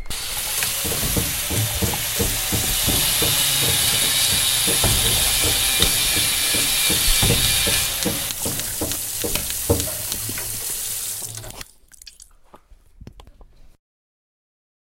sonic postcard Daniel Sebastian
water dripping, drill, bang
bang, school, water